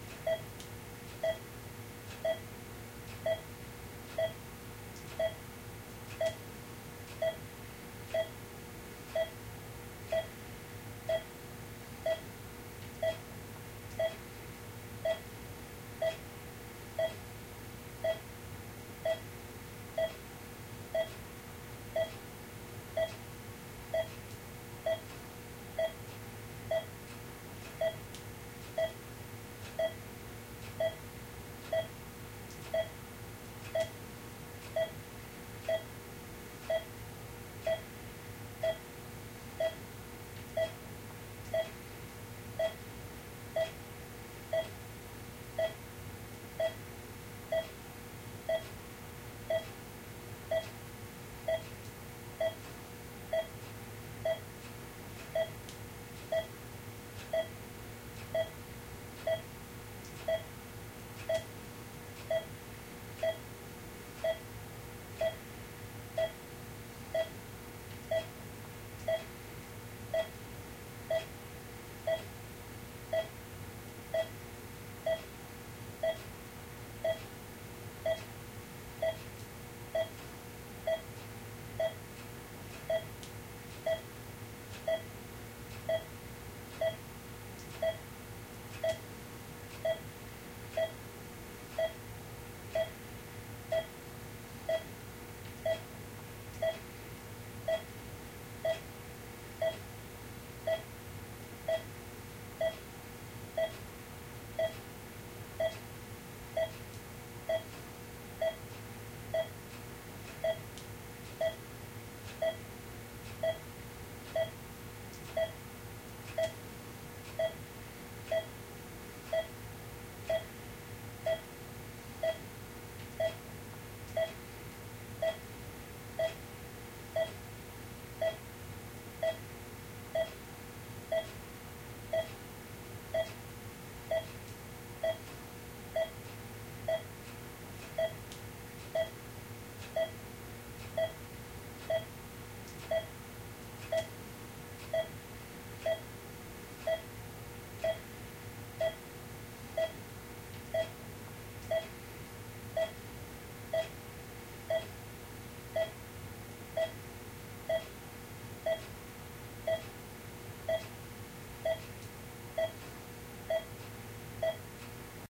Distant sound of a normal pulse monitoring system